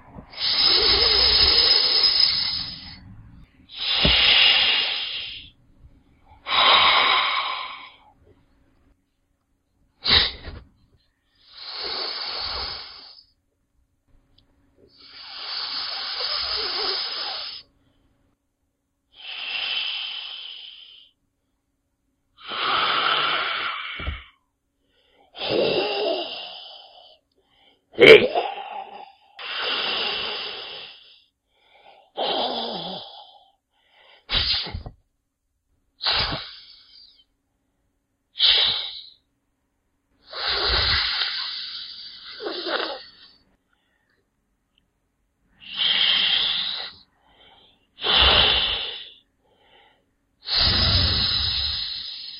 I wasn't able to find the right type of ssss hiss on the site, so I recorded a variety for people to use.